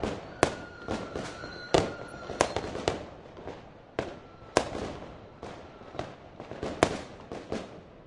Fireworks recording at Delphi's home. Outside the house in the backgarden. Recording with the Studio Projects Microphone S4 into Steinberg Cubase 4.1 (stereo XY) using the vst3 plugins Gate, Compressor and Limiter. Loop made with Steinberg WaveLab 6.1 no special plugins where used.